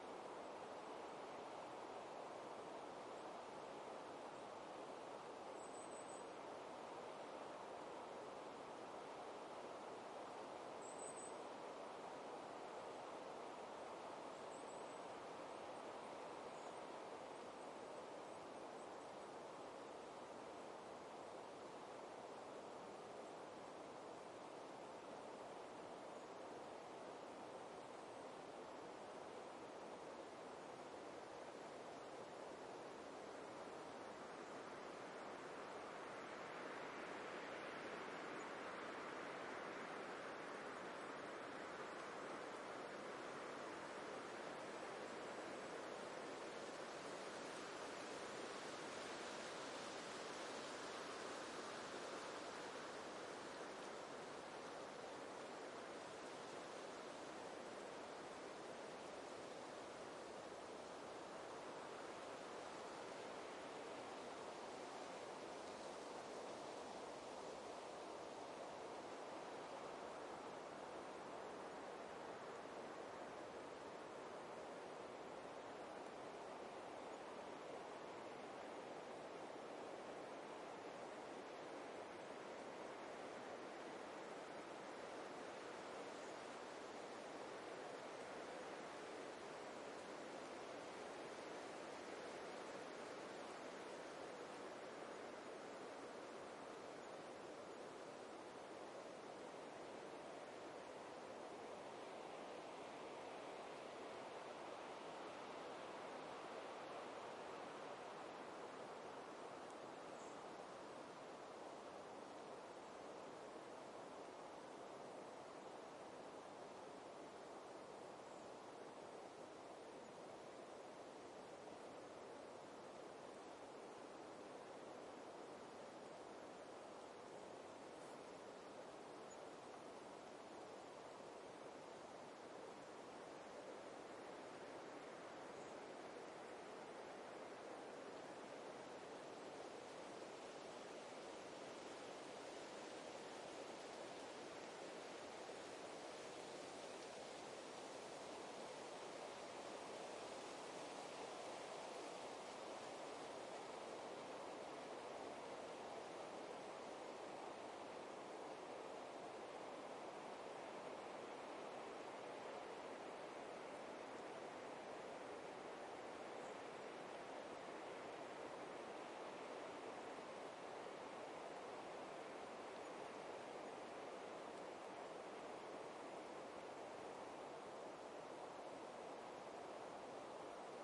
This soundscape was recorded on sunday the first december 2013 at the Einemhofer Forst (forest) in district Lüneburg (Lower Saxony, Germany). It was a characteristic cloudy and windy autumn morning. Sometimes one can hear the rustling of the leaves of a young oak nearby and some raindrops subtle hitting the leaves.
It was recorded with Zoom H6 and it's XY microphone and Sound Forge Pro was used for editing.